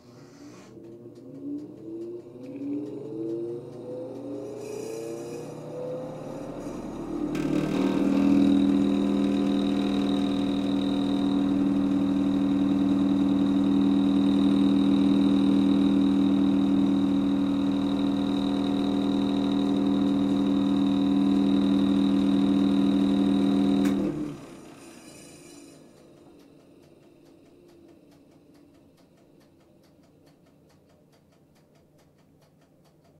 Fan on timer bathroom
Fan on a timer turning on and off in a bathroom.
timer, fan, bathroom, tick, vent, ticking